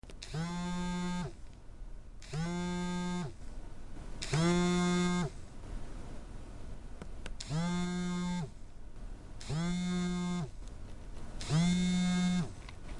cell phone vibrating
cell, ring-tone, phone, alerts, ring, a, cell-phone, alert, ringtone, cellphone